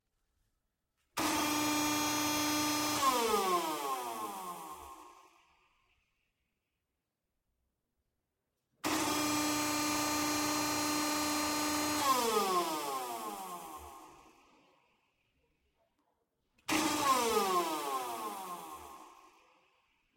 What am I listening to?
Metal Punch 01 Cleaned
robot, motor, machine, pump, spool, hydraulic, robotic, factory, machinery, industrial, mechanical, whir